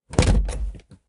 It's a door... It opened... Nice
Noticed that my door was quite loud - so I recorded some sounds of it with my phone close to the moving parts of the door.